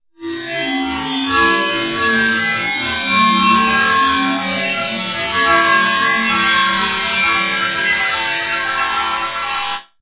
Granulated and comb filtered metallic hit
comb, grain, metal, resonant